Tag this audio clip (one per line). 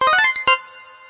effect
effects
game
sound